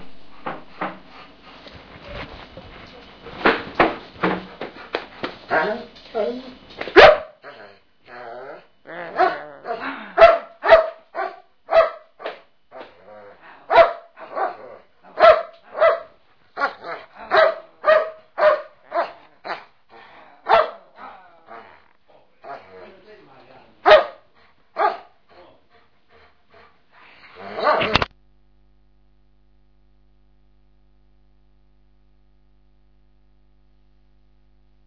My dog still waiting ...
barks, dogs, whining